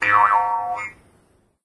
jews harp 13
A pluck on a Jew's harp.
Recorded late at night in my bedroom on a Samsung mp3 player.
Unfortunately the recording have a lot less warmth to it than the instrument has in reality.
13 of 15
trump, ozark-harp, jaw-harp, drone, jews-harp, mouth-harp, guimbarde